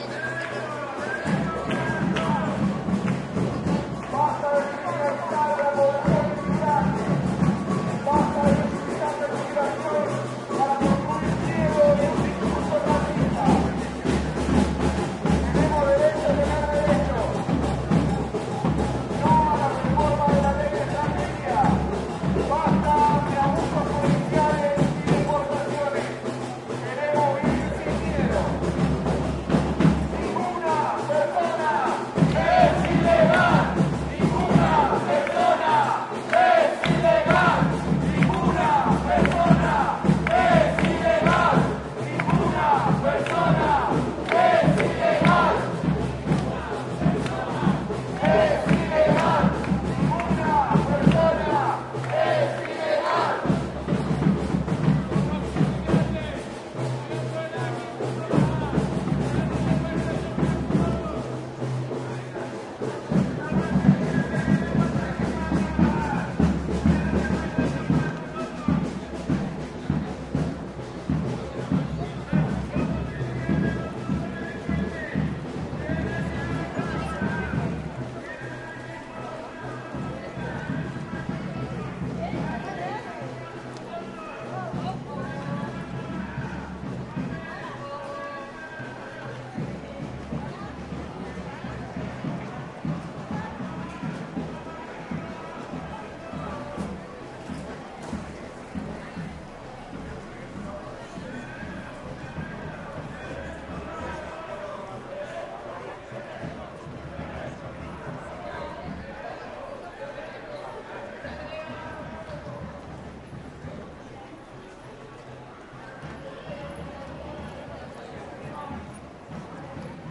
mid-distance take of a demonstration against upcoming modifications to Spanish immigration law to make it more restrictive. Not many participants (compared to the number of cops), but making a lot of noise with percussion instruments and one megaphone. In this take the slogan they shout in Spanish is 'Ninguna persona es ilegal' (no person can be illegal). Recorded along Calle Tetuan (Seville, Spain) using Edirol R09 internal mics
city, drum, field-recording, marching, megaphone, parade, percussion, seville, slogans, spanish, vocal